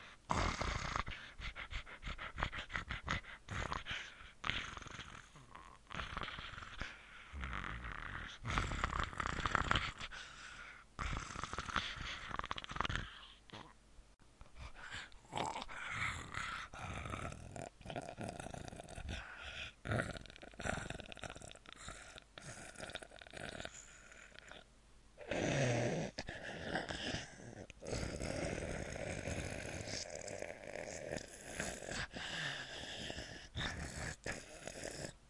Zombie breathing
undead, breathing, Zombie